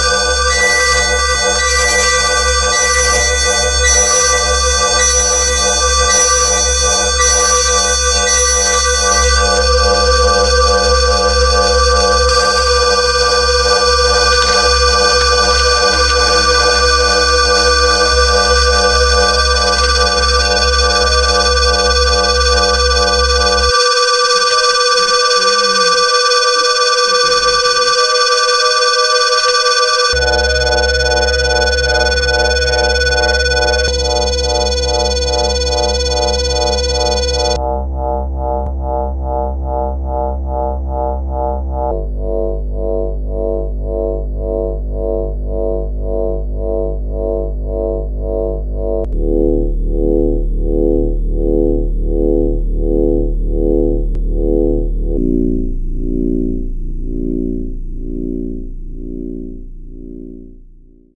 A member of The Star Federation is brain scanned to secure he isn't a infiltrated vulcan spy. Only one is accepted in the Enterprice crew: Spock.
Galaxy, scanning, Federation, Star, memory, brain, SPACE